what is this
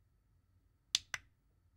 This is the sound of an on/off switch for an LED light recorded with a TLM 103 through a Scarlet Solo using a dbx 286s on an iMac.
camdenMIDIDAWI, jfeliz, new-stuff